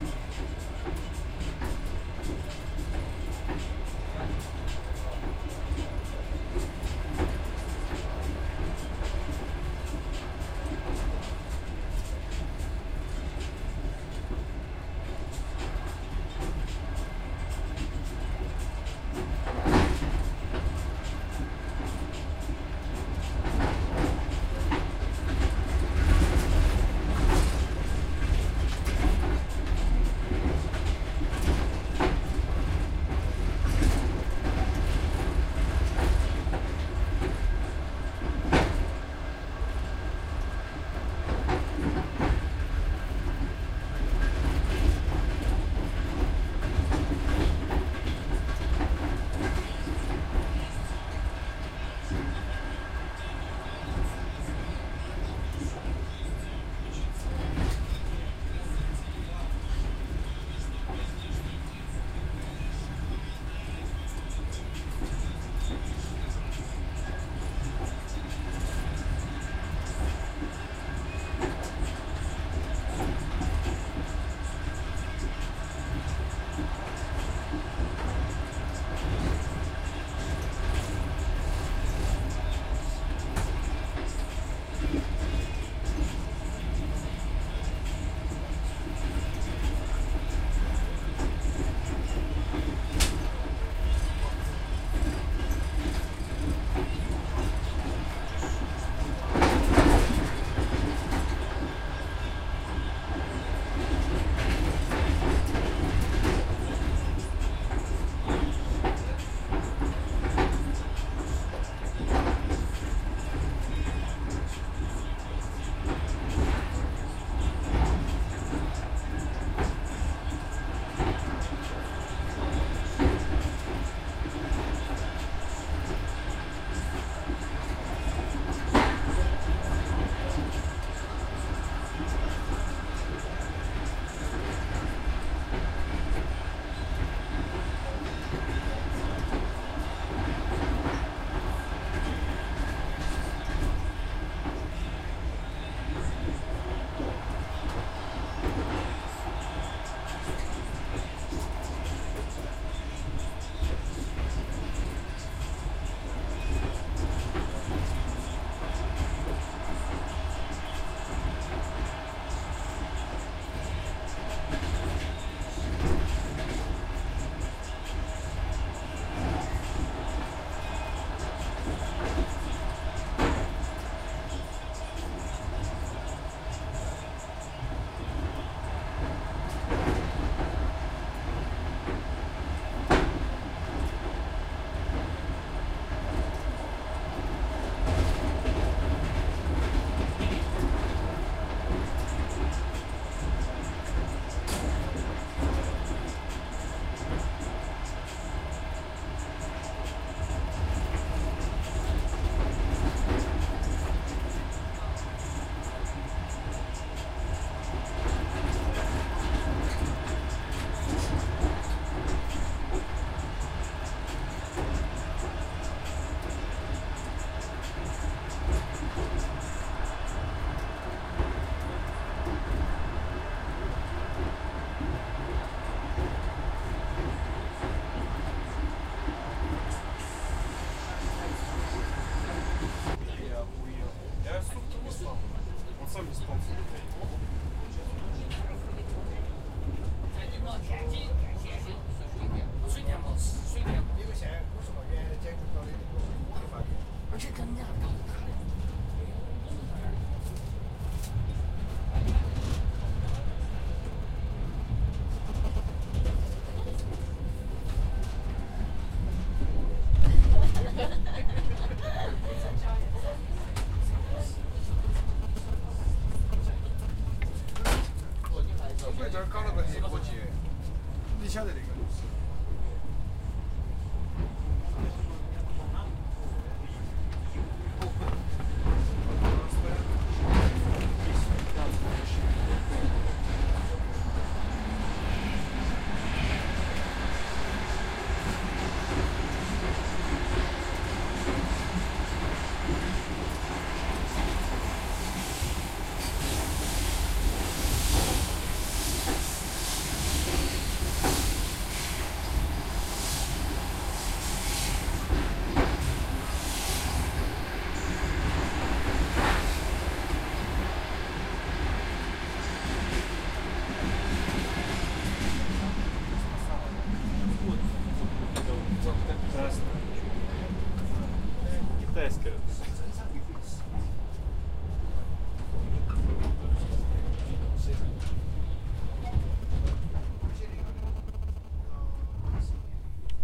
Distant Russian trap music heard from a train cabin. Khabarovsk - Yerofey Pavlovich
In corridor. Train steward is listening to trap music in her personal cabin. Sub-divided hi-hats. Moving through the wagon, some radio interference. Recorded with Tascam DR-40.